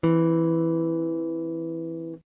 Jackson Dominion guitar. Recorded through a POD XT Live, pedal. Bypass effects, on the Mid pickup setting.
chord, electric, guitar, strum